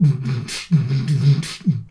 Loop2 008 Nasal (approx120bpm)
I recorded myself beatboxing with my Zoom H1 in my bathroom (for extra bass)
This is a nasal beat. Don't know the exact bpm but is very close to 120 (slightly over).